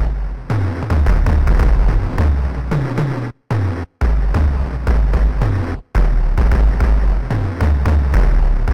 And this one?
This loop has been created using program garageband 3 using a drum kitharder of the same program
drum, kit